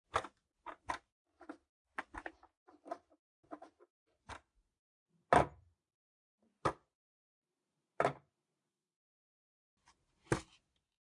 skateboard noises
Skateboard sounds recorded in a closet and cleaned up in Audition for a class project.
board, deck, skateboard, skateboard-trucks